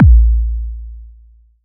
KICK PACK 0301
KICK DRUM BD